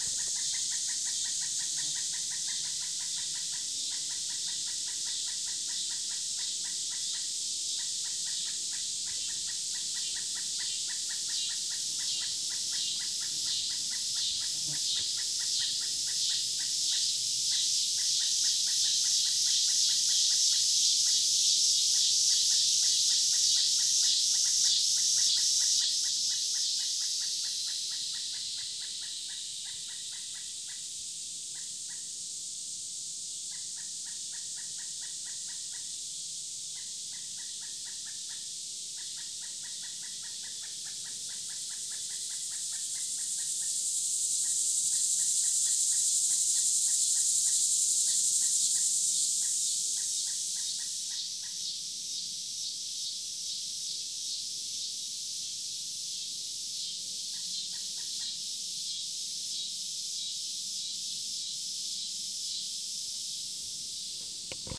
I captured this excited Pileated woodpecker on the edge of some deep woods, on a hot dusk in August 2010. It was about 7:30 on a calm night at the edge of the Shawnee National Forest. The tempurature was 85 degrees.
What I find really enjoyable though is the rising, and ebbing and flowing of, I believe the typical summer cicadas.
Recorded using my Zoom H4N recorder using the internal buil-in microphones.
sound-scape, woodpecker, cicadas, Summer, nature, forest